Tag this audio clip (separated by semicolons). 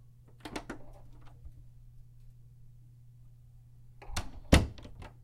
Bedroom; field; recording; Wood